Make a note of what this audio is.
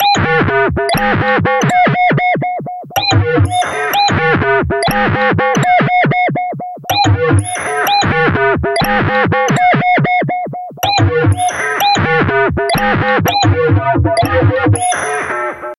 Nobody's Business
synth, trance